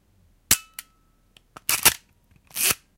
Actual sound of an old Agfacolor 110 camera
110, agfa, camera, film, h2, photo, release, shutter, transport